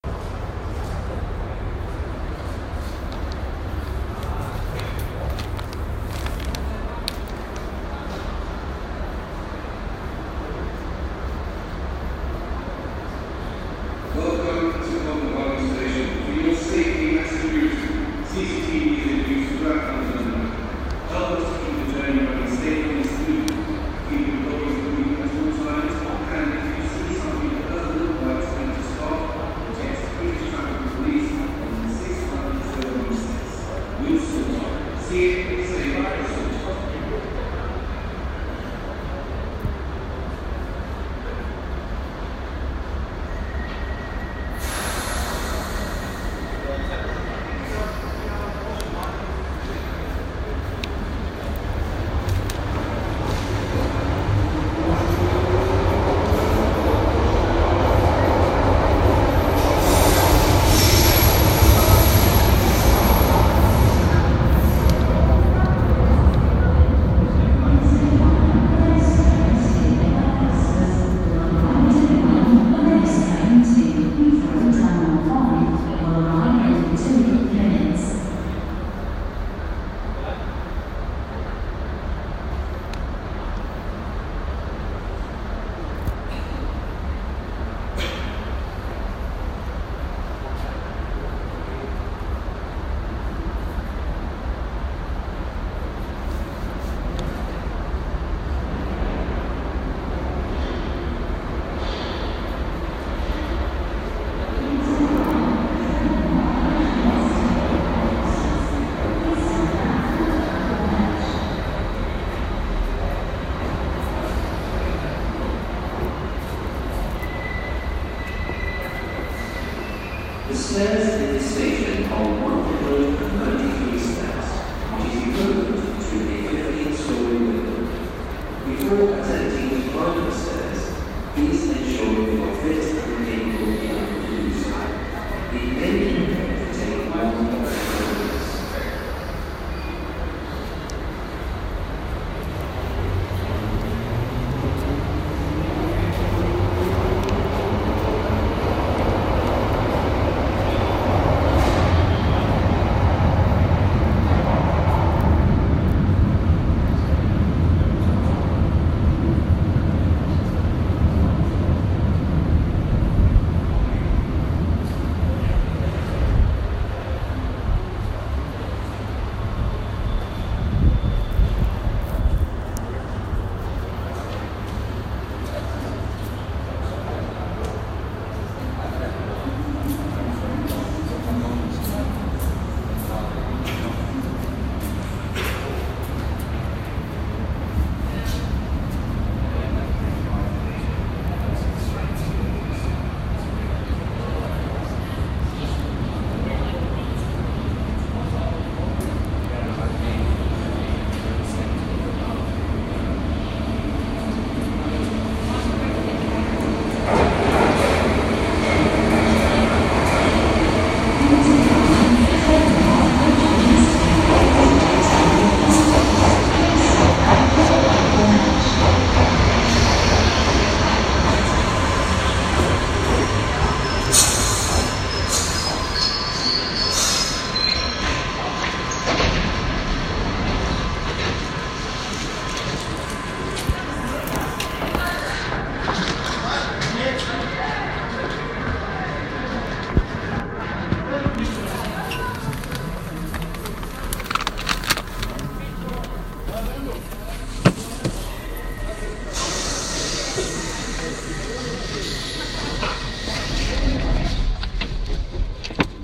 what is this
London Underground Station Covent Garden Platform
Record some Covent Garden London Underground Platform Ambience
Covent, Underground, Station, Ambience, Tube, Subway, Garden, London, Train, Doors, Platform, Announcement